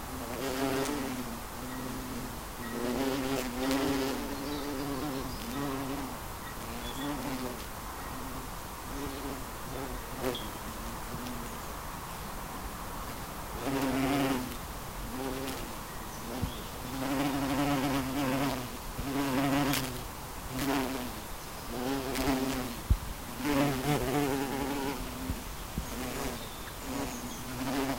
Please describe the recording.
Two bumblebee in the garden,
Eqipment used;
Recorder Zoom H4n pro
Microphone Sennheiser shotgun MKE 600
Rycote Classic-softie windscreen
Wavelab